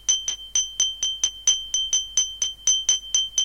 This is a recording from a glass that is half-full with water.
water-in-glass, half-full-glass, waterglass, glass, toast, water